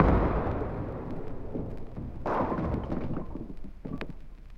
Sampled sound from the bowling alley
bowling, sample, sports